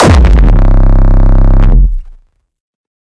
Hardstyke Kick 16
bassdrum, distorted-kick, distrotion, Hardcore, Hardcore-Kick, Hardstyle, Hardstyle-Kick, Kick, layered-kick, Rawstyle, Rawstyle-Kick